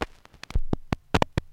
Short clicks and pops recorded from a single LP record. I carved into the surface of the record with my keys and then recorded the sound of the needle hitting the scratches.